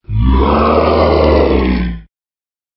Scary monster roar.
growl, noise, roar, scary